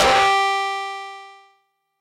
DRM syncussion german analog drum machine filtered thru metasonix modular filter.
machine, filtered, drum, hesed, drm, syncussion, metasonix